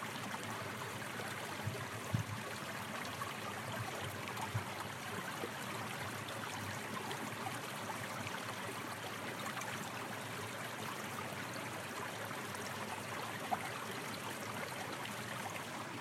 Water Flowing in Small Rocky Stream 1
Water flowing over and under small rocks in a stream at the point from which it emerged from a cliffside.
boulder, creek, rocky, stones, stream, water